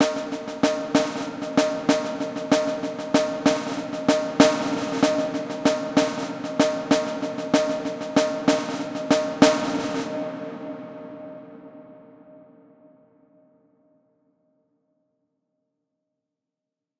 marching snare with reverb
drum, drumroll, hall, march, marching, military, roll, snare